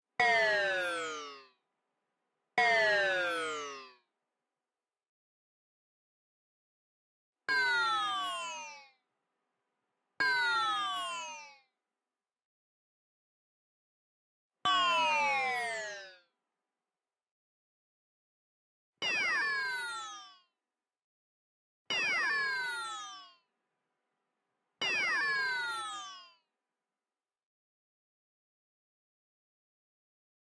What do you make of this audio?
Synth descending tones, glitchy
Synth-generated descending tones, some glitchy, resonant and sharp.
descending, electronic, error, sound-design, tones, computer, synth, electro, glitchy